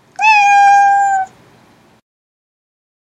Kitty Meow
A recording of my cat meowing.
animal, cat, feline, kitty, meow, mew, pets